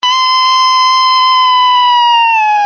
A woman screaming.
666moviescreams, female, pain, scream, woman